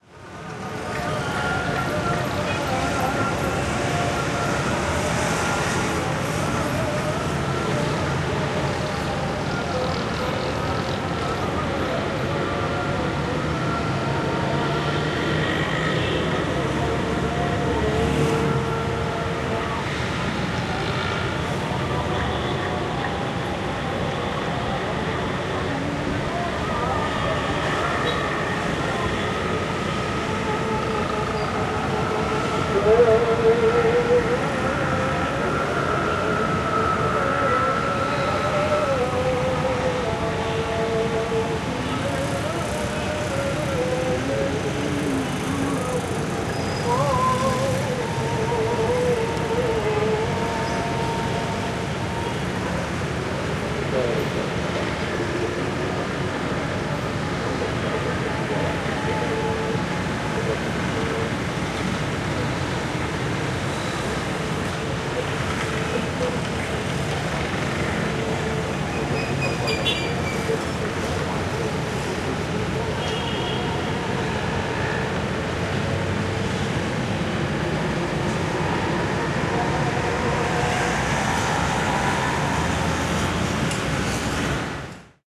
Muezzin 22Dec06Jakarta 12am
embedded muezzin-chants in moderate traffic-noise at 12h am